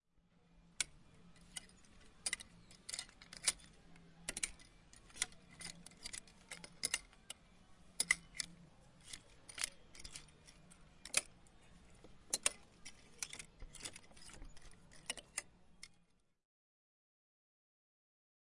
Shifting coat hanger.
Recorded on Zoom H4n.
Close perspective, inside.
coathanger, Czech, shopping, hanger, clothes, Pansk, CZ